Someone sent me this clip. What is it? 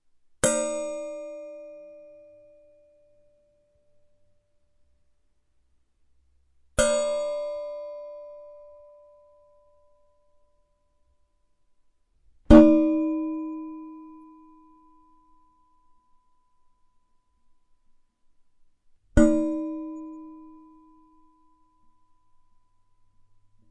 Hitting a metal bench scraper twice with a metal knife, then twice with my thumb. Recorded with AT4021s into a Modified Marantz PMD661.
bing, ding, kitchen, metal, percussion, ring, sound-effect, utensil